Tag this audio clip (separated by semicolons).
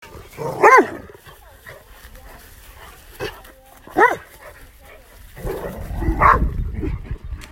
Great-Dane; ridgeback; dog-bark